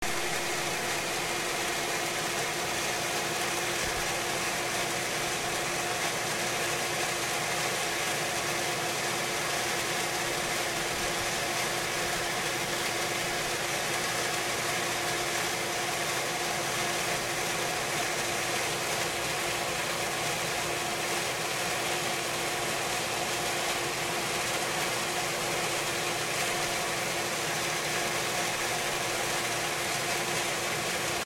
Pool Pump
This is the sound of the pump for the pool, pumping in water and filtering it. Recorded with iPhone 8.
machinery, pool, pump, machine